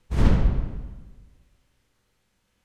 This sample is actually the sound of turning my guitar effect off during a recording. It was treated with epicVerb + stereo enhancement.
bass, boom, hit, processed, reverb